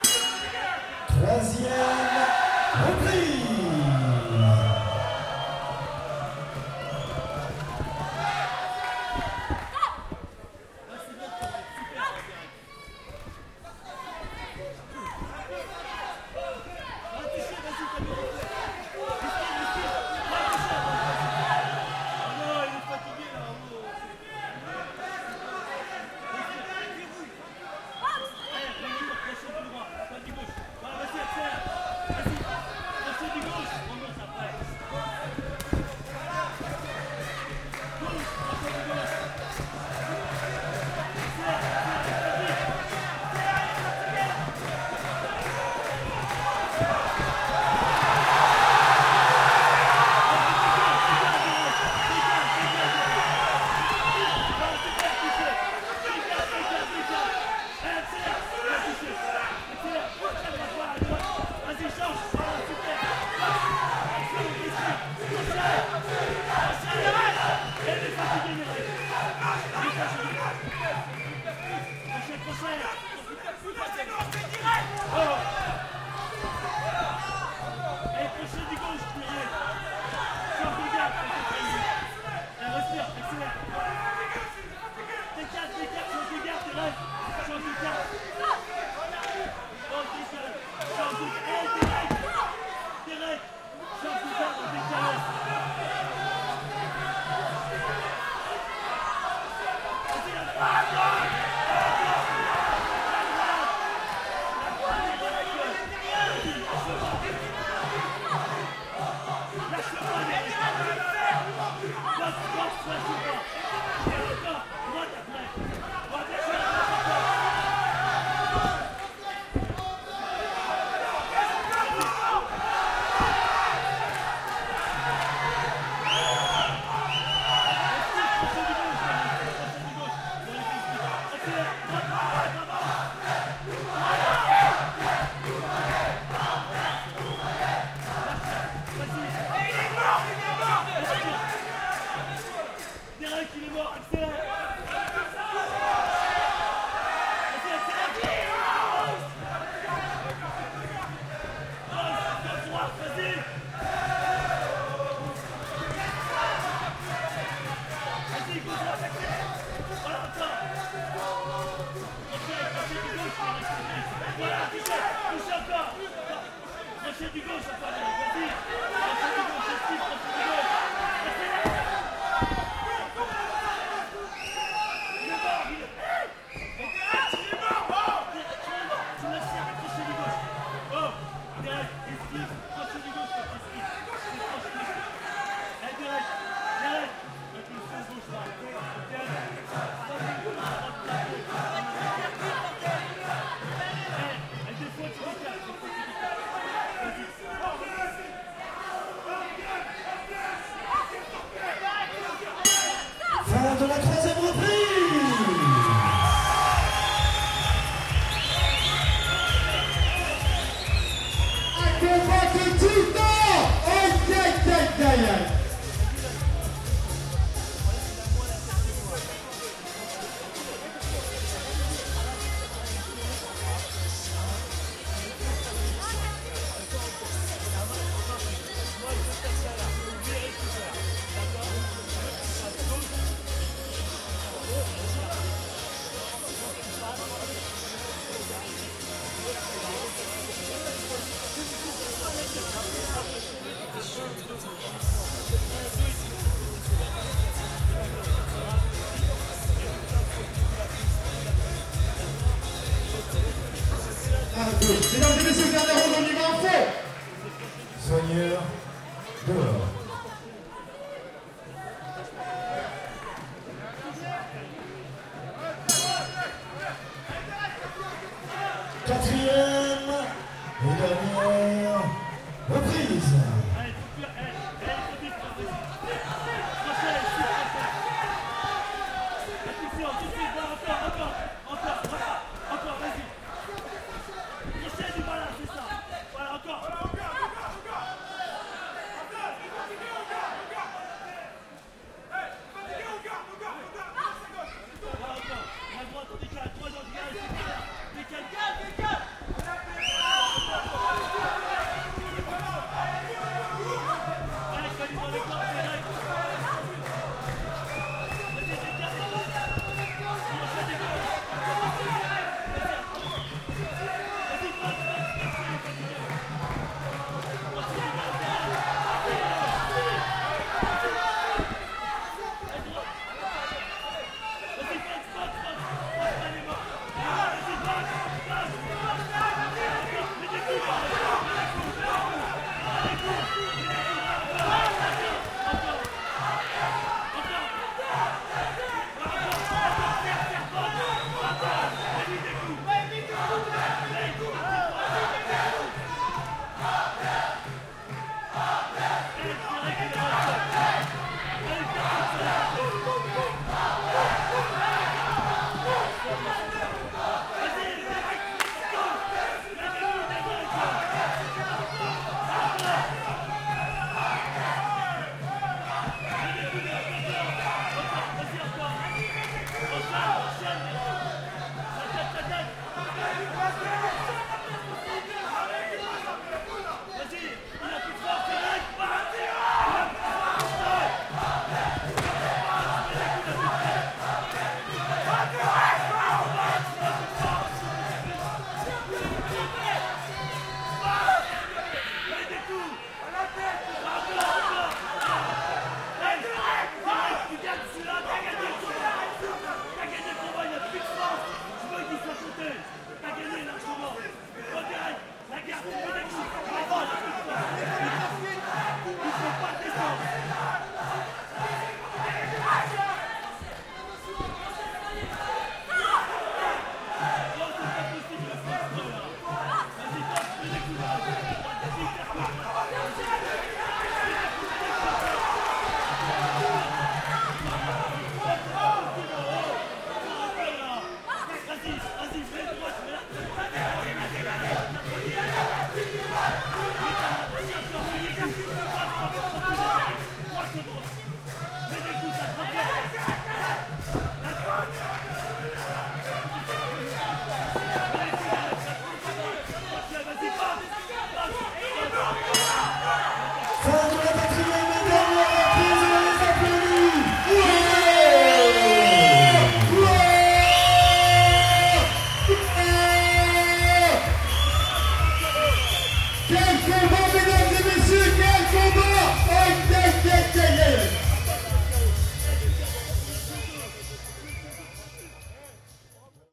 boxe match
Box match in North France.2014
Gong, speaker, fight with fervent supporters shouting, coach advice. 2 rounds.
Recorded with schoeps set up: overhall AB ORTF couple and 4 CMC6 MK4 located at the corners of the ring.
Reducted to LR stereo
boxe, match, sport